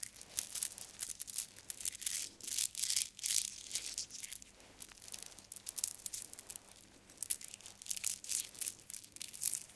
A hand playing around with a necklace with stone beads.
playing, movement, beads, hand, necklace, ticking, stone, bead